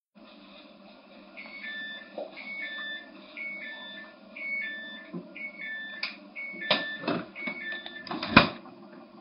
If I leave my fridge's door open for too long, it makes this two-tone alarm sound to remind me. Typically it does this after about a minute but I cut all the delay out because you probably just want the sound of the alarm.
Gee, I wish you could put sounds into multiple packs. This one fits both my 'kitchen appliances sounds' and my 'alarms' packs but I'm not sure which one to put it in straight away. It's in 'whatever' for now, my pack for sounds that don't fit any of my other packs. but I want YOU to decide.
Recorded with a 5th-gen iPod touch.